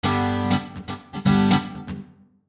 Pure rhythmguitar acid-loop at 120 BPM